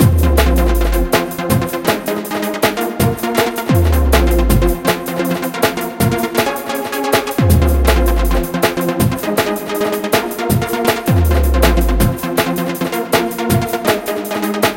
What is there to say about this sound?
Groove-synth-loop-130-bpm
rave, techno